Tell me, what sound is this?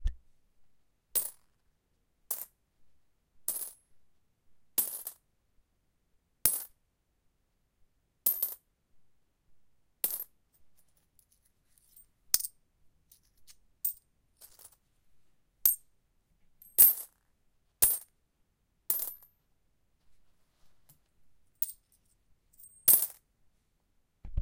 Coins Being Dropped-Assorted
An assortment of sounds made by dropping coins.
cash, money, coin, Coins, game